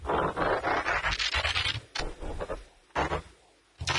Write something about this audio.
A few years ago, while experimenting with the newly release "Gross Beat" effect plugin, I stumbled over a possible way the "transform" up and "transform" down sounds were designed/made in the mid 80's --the chief mechanic is I believe a "spin" down with a turntable for starters... here is "Up"
Machine, Mechanical, Robots, Transformers